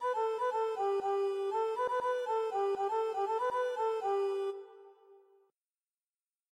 Win Game
gain, earn, win, acquire, achieve, get